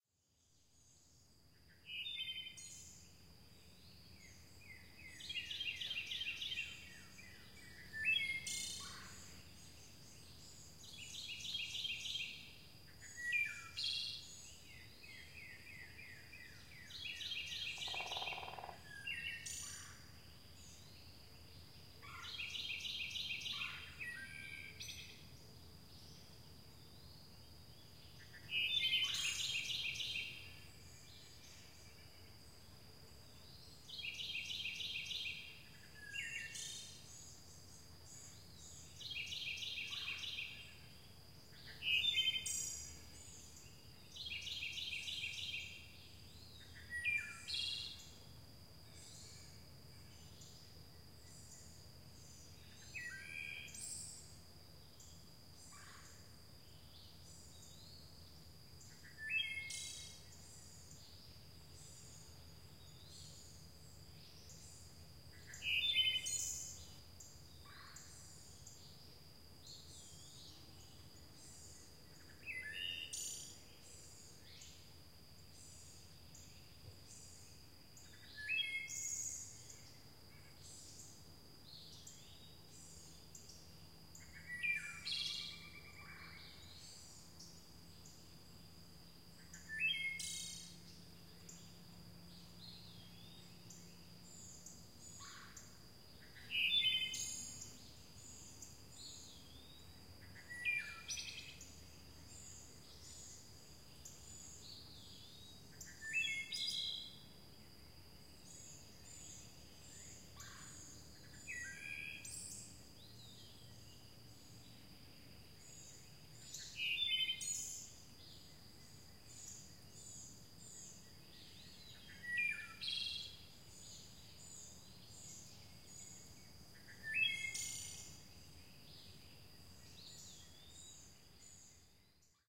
This wood thrush was recorded around 7:30 in the morning in heavy woods in deep southern Illinois. Unlike my earlier posting of the wood thrush singing at dusk, this morning recording has the wood thrush singing with lots of company, although he clearly rises above his neighbors. 17 seconds into the recording you hear the drumming of a woodpecker, and at one-minute 20 seconds into the recording you hear the distinctive-lonely sounding call of the huge Pileated Woodpecker. Recording made with good-quality stereo microphones so use headphones to get a wonderful experience.